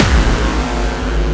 HardcoreKick Seq03 10
A distorted hardcore kick
hardcore, kick, one-shot, distorted